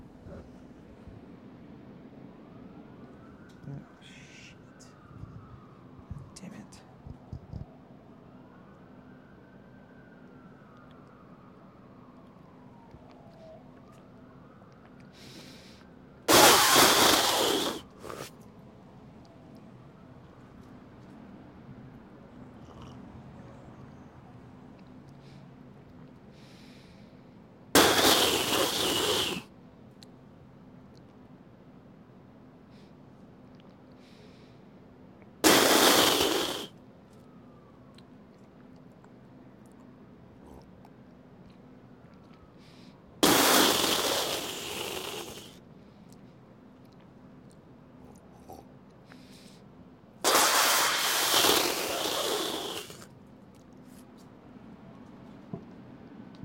Please Excuse the sirens and me cursing about the sirens in the background
Recorded on a Zoom H4N Pro with a Rode NTG3
The best take I thought was the last one